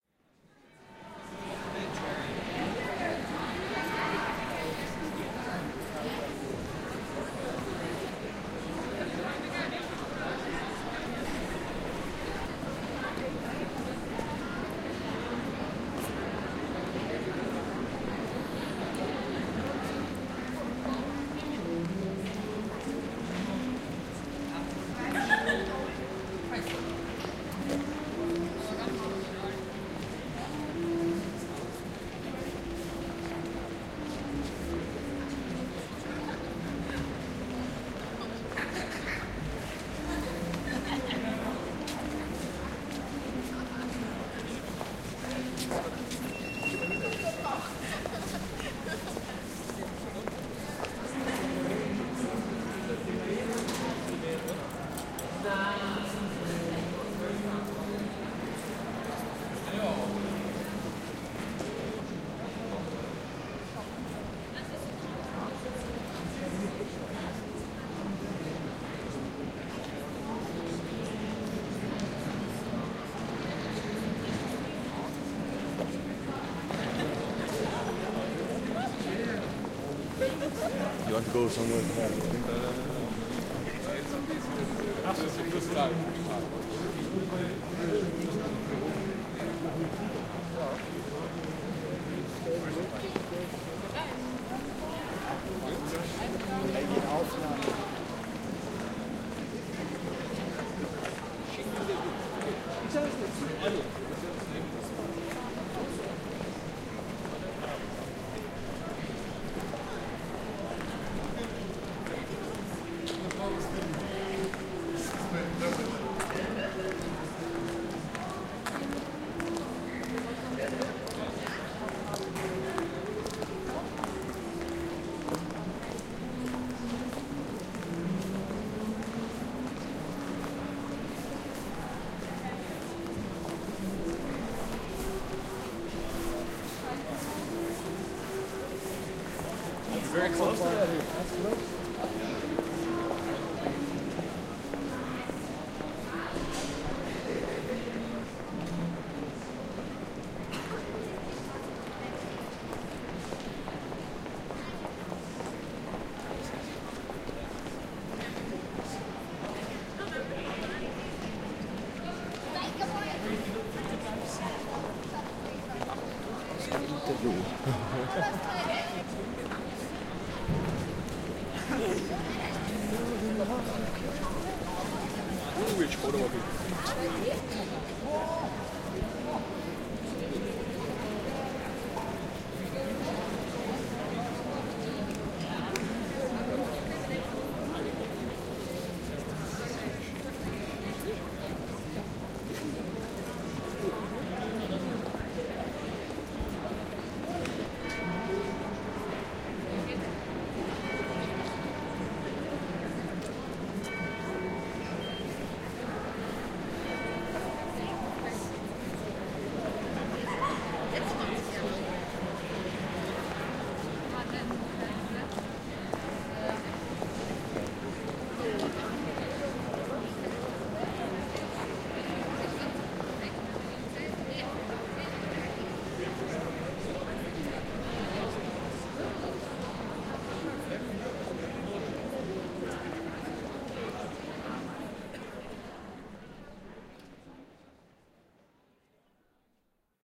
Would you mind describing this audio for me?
Evening at Stephansplatz in the city of Vienna, Austria. Open air ambience, people chatting in several languages, footsteps, distant church bells, some notes from a saxophonist improvising in the distance, girls laughing. XY recording with Tascam DAT 1998, Vienna, Austria